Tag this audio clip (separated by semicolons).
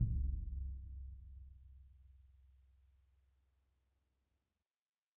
bass; drum